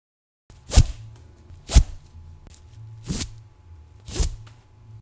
Rope Knots - Nudos Cuerda
Sound of different intensities of Rope Knots. Recorded this at my Studio Location. Hope it is useful =)